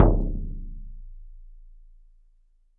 Shaman Hand Frame Drum 19
Shaman Hand Frame Drum
Studio Recording
Rode NT1000
AKG C1000s
Clock Audio C 009E-RF Boundary Microphone
Reaper DAW
frame, hand, sticks, shamanic, drums, percussive, percs, percussion, bodhran, drum, shaman